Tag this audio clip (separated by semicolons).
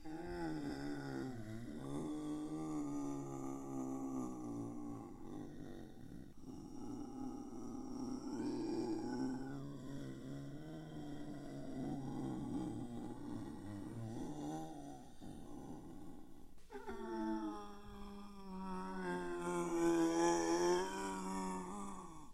alien; attack; bioshock; crazy; creepy; criminal; fear; horror; left4dead; monster; mutant; mutated; mutation; scary; sci-fi; screaming; terror; thrill; zombie